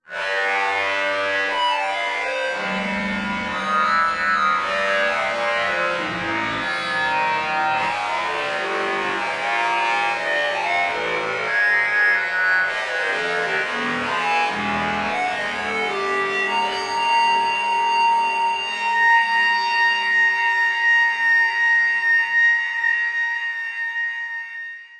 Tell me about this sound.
Time-Stretched Electric Guitar 6
An emulation of an electric guitar, synthesized in u-he's modular synthesizer Zebra, recorded live to disk and edited and time-stretched in BIAS Peak.
time-stretched, rock, synthesized, blues, psychedelic, metal, guitar, Zebra, electric